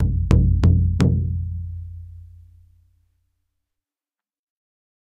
NATIVE DRUM QUADRUPLE STRIKE 05
This sample pack contains 9 short samples of a native north American hand drum of the kind used in a pow-wow gathering. There are four double strikes and five quadruple strikes. Source was captured with a Josephson C617 through NPNG preamp and Frontier Design Group converters into Pro Tools. Final edit in Cool Edit Pro.
indian, north-american, aboriginal, percussion, first-nations, ethnic, native, drum, hand, indigenous